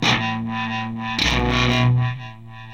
Some Djembe samples distorted
dark, distorted, distortion, drone, experimental, noise, perc, sfx